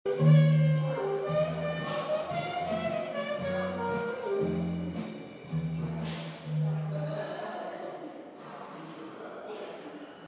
Jazz music that fades into a crowd of people talking and laughing